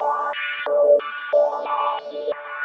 filtered pad stab
A short filtered pulsing pad.
Good for dub, house and ambient.
All my own work.
filtered, effect, ambient, soundscape